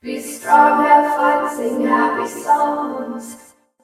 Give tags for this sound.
female; lyric; vocoder

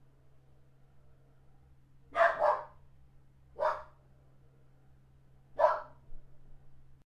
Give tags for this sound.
bark; chile; dogs

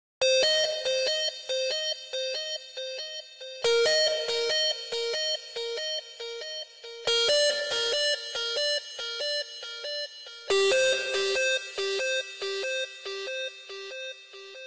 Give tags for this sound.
techno; hard; progression; melody; synth; 150-bpm; trance; sequence; beat; phase; distortion

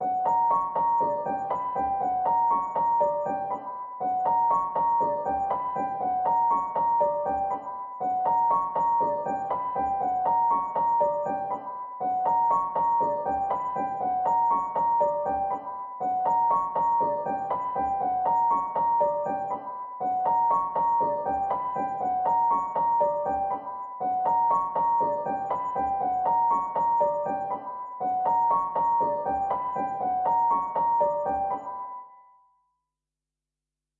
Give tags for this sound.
120bpm; bpm; music; reverb; samples; simple

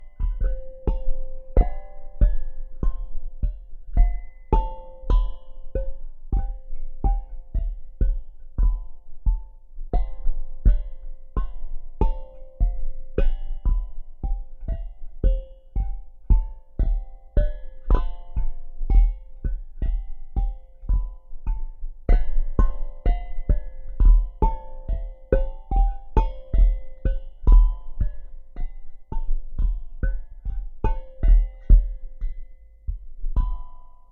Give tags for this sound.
percussion; drum; hit; toy; sticks; asmr; rhythm

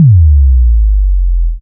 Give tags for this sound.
bass; bass-drop; bassdrop; deep; frequency; low; low-frequency; sample; sine

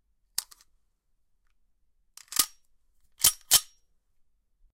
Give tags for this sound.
9; ambient; beretta; cal; fx; gun; reload; sound

